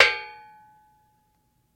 The sound of a metal folding chair's back being flicked with a finger.